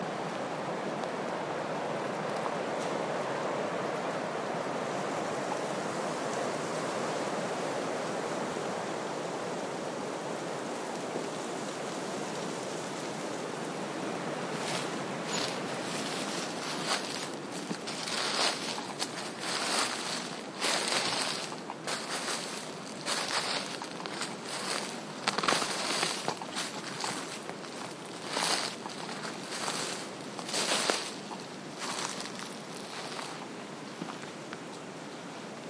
20060218.winter.walk
sound of wind in trees (pines), then someone walks on dead leaves /viento en los pinos y pisadas sobre hojas secas
field-recording, footsteps, forest, leaves, nature, trees, wind, winter